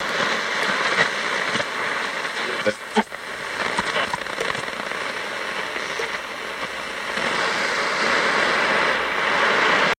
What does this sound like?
am tuning 2
Sweeping the dial across a portion of the MW radio band- sound of static and a few short voices. Recorded from an old Sony FM/MW/LW/SW radio reciever into a 4th-gen iPod touch around Feb 2015.
frequency, static, interference, voice, mw, tune, amplitude-modualation, am, sweep, tuning, radio, medium-wave, noise